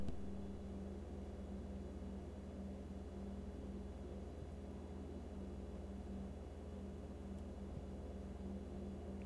refrigerator hum
The hum of a fridge.
fridge, refrigerator